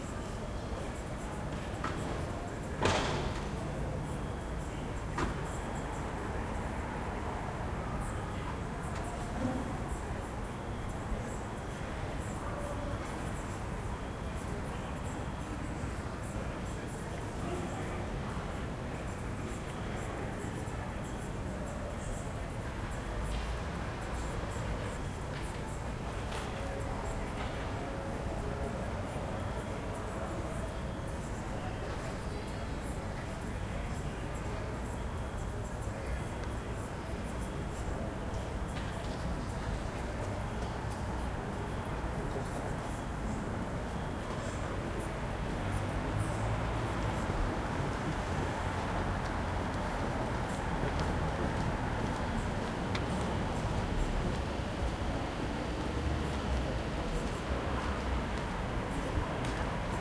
Lazy street in a residential neigborhood by night, no traffic, summer in the city